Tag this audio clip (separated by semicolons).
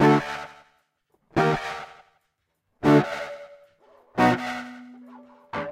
echo; guitar; tube